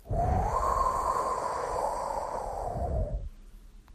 wind breeze swoosh air gust